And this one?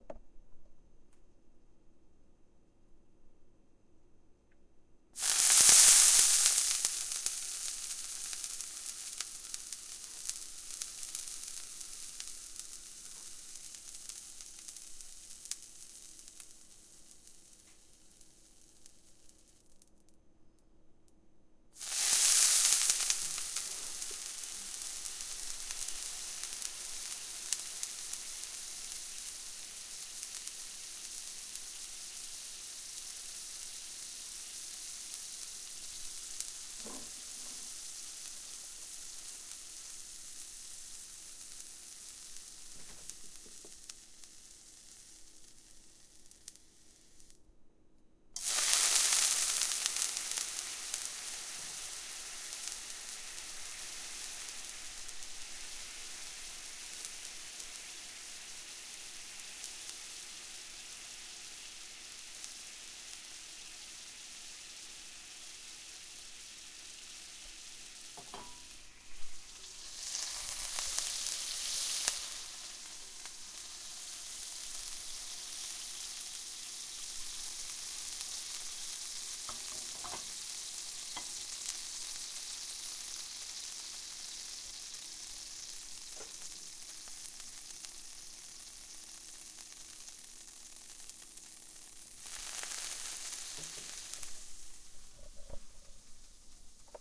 Sizzling drops of water in a pan